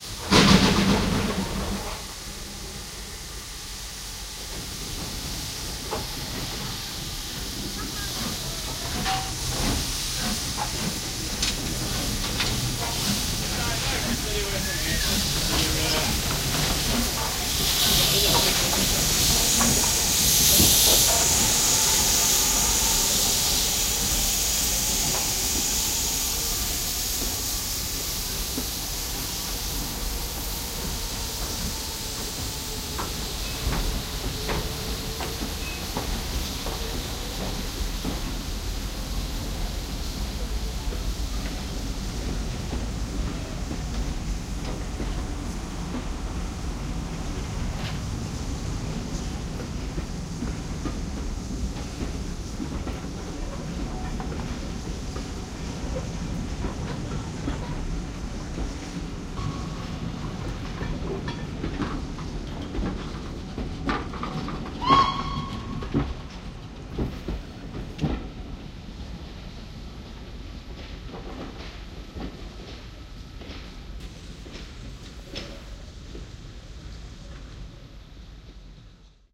Steam Train 1
Steam train leaving Pickering Station, North Yorkshire, UK. Recording binaurally using a home-made set made from Primo EM-172 capsules into a Zoom H2.n recorder.
More information here: